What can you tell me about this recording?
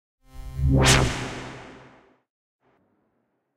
Wet digital transition effect (reverberated)